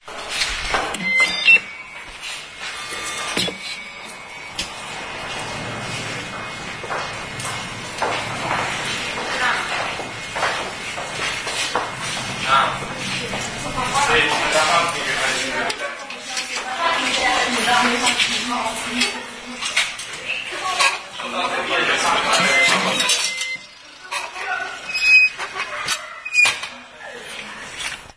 25.10.09: about 14.00, the Stary Browar commercial centre in Poznań/Poland. the work of the parking meter
stary-browar, commercial-centre, poland, poznan, parking-meter, shopping-mall, car-park, shopping, field-recording